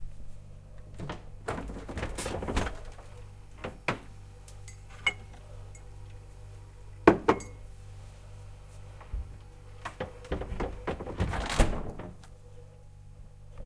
Otvírání a zavírání lednice.
food, freezer, fridge, kitchen, refrigerator